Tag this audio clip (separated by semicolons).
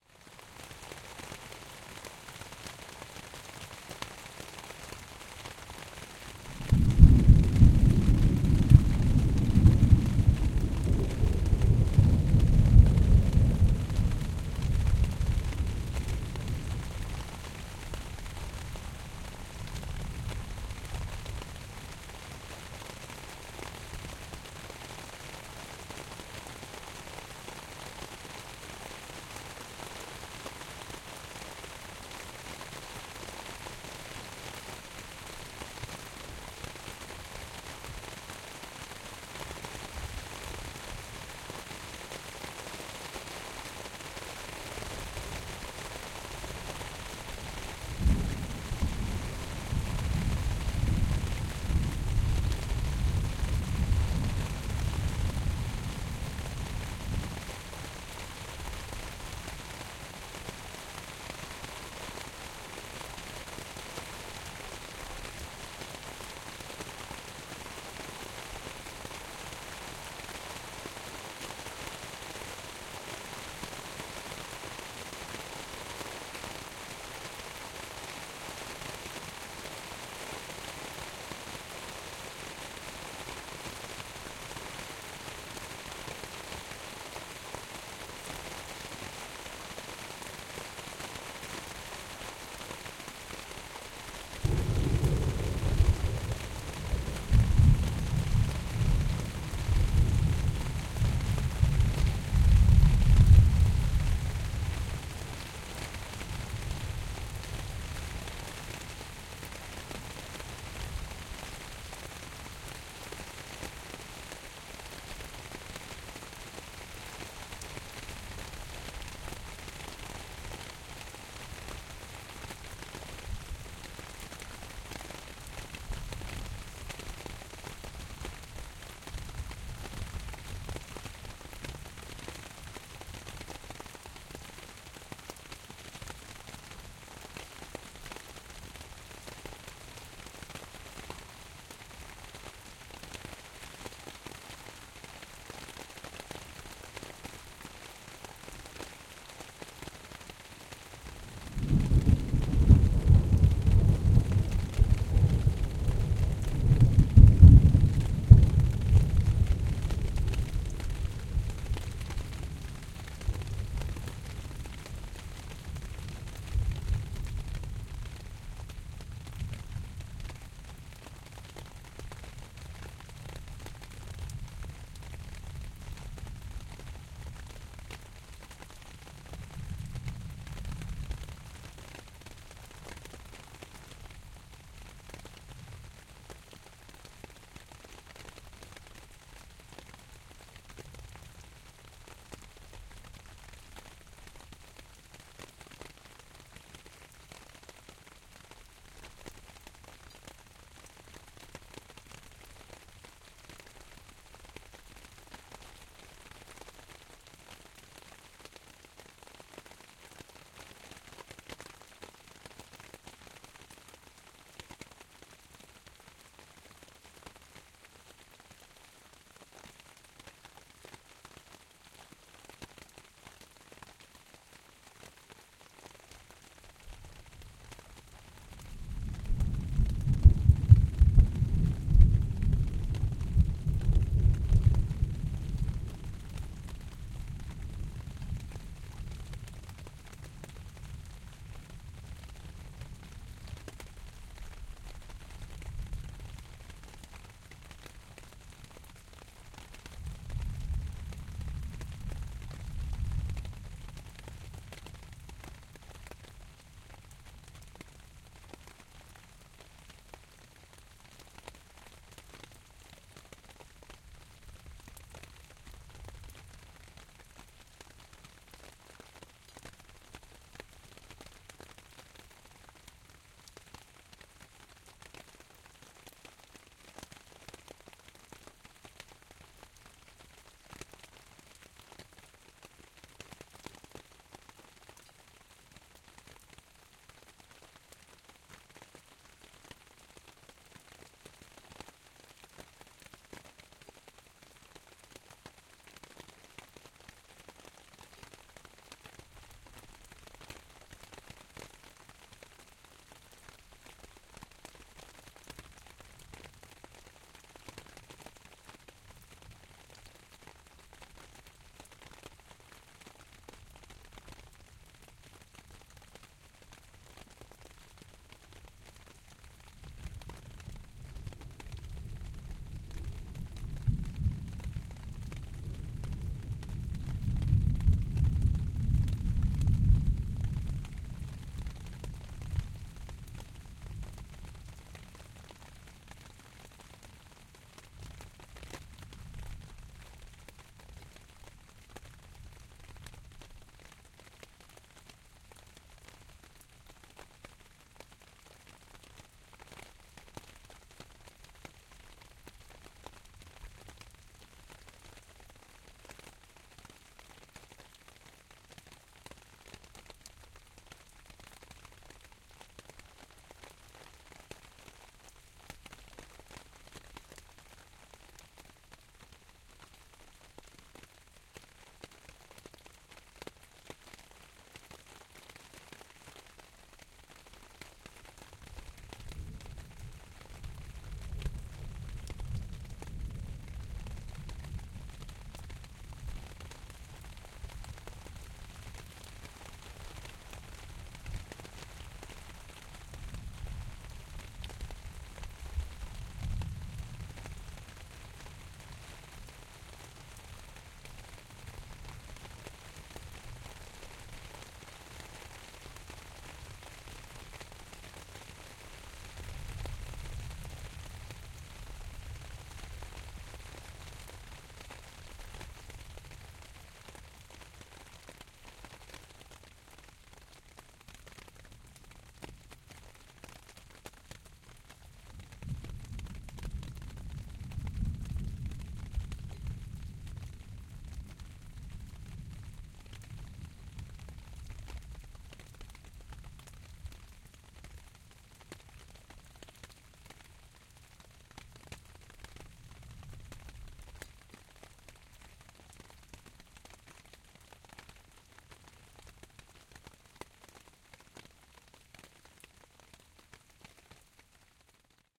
4060; Ambi; Ambiance; binaural; DPA; Florida; NAGRA-SD; reverb; sail; tent; thunder; valley